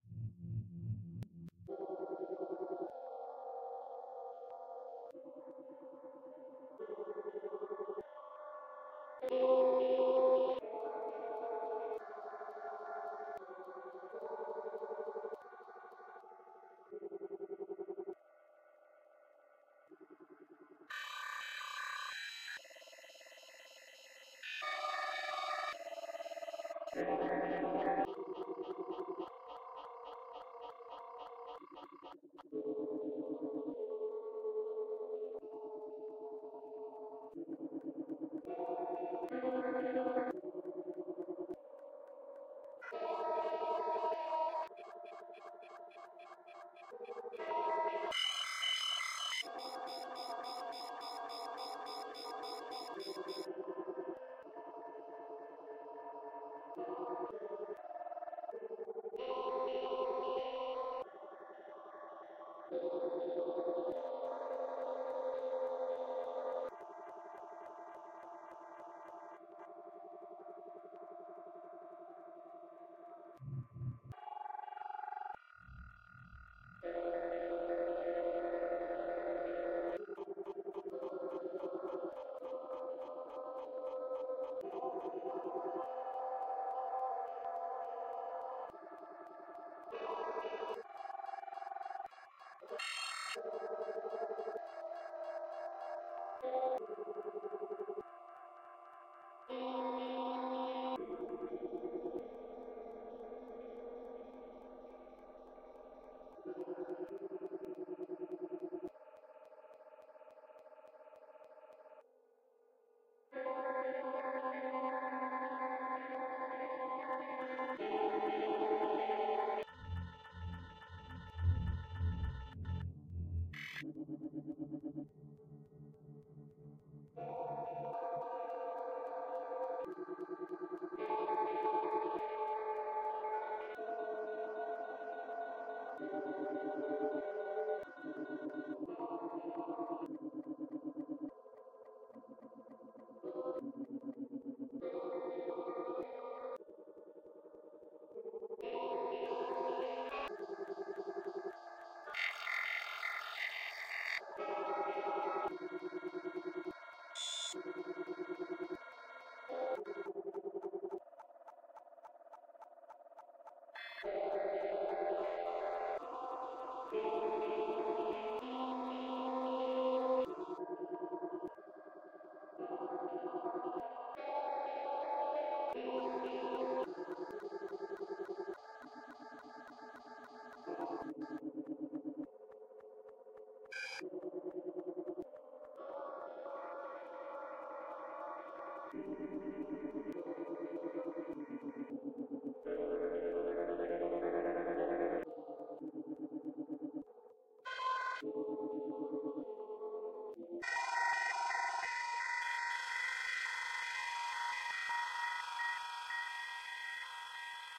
signal transmission
Somewhat spooky sound of digital transmission - modem, radio, pocket or alike.
Contains elements similar to processed voice.
code, data, electronic, spooky, transmission, modem, digital, signal, computer, beep, telephony, radio